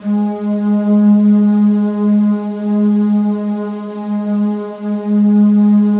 07-flutepad TMc
chorused stereo flute pad multisample in 4ths, aimee on flute, josh recording, tom looping / editing / mushing up with softsynth